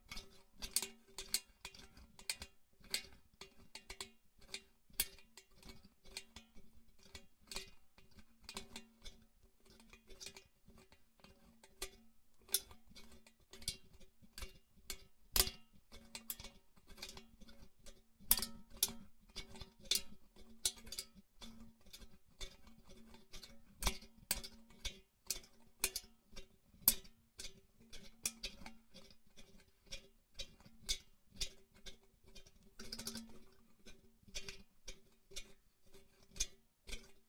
Small metal bucket swinging on its handle while being carried.
hinge, metal, metallic, swinging, hinges, movement, swing